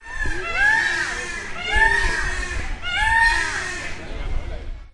Pavo real y Chajá
Call of a male Peafowl (Pavo real, scientific name: Pavo cristatus), and on the background call of a Southern screamer (Chajá, scientific name: Chauna torquata), and ambient sounds of the zoo.
animals, Barcelona, Birds, field-recording, Peafowl, Southern-Screamer, Spain, ZooSonor